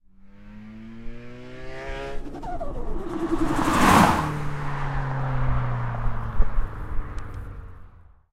Subaru Impreza STI drive around to the left
around, drive, Impreza, STI, Subaru